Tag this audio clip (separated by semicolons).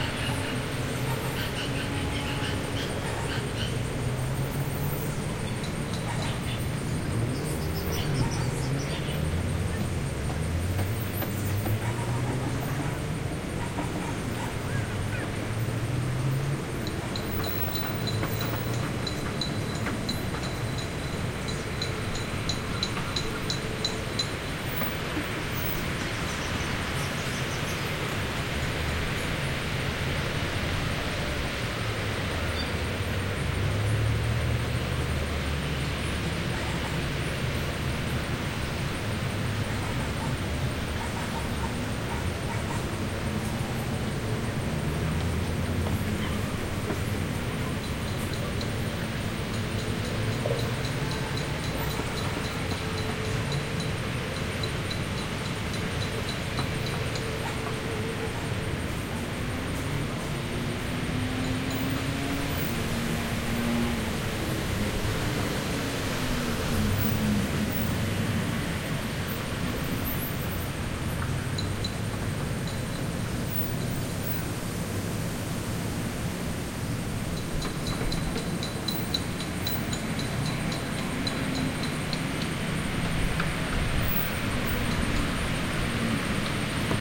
City; Field; Nature; Recording; River